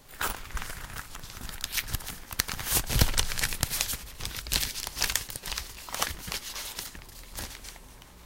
crumbling-paper
bag, crumbling, paper